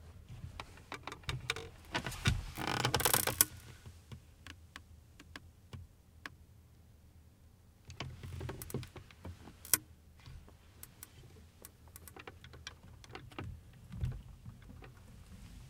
chair sitting 4
By request.
Foley sounds of person sitting in a wooden and canvas folding chair. 4 of 8. You may catch some clothing noises if you boost the levels.
AKG condenser microphone M-Audio Delta AP
soundeffect, foley, wood, sit